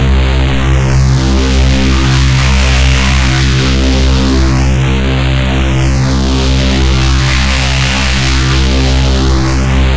ABRSV RCS 003
Driven reece bass, recorded in C, cycled (with loop points)
bass, driven, drum-n-bass, harsh, heavy, reece